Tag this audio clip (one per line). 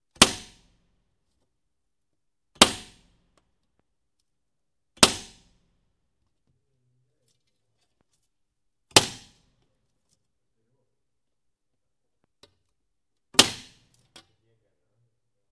break
breaking-glass
indoor
window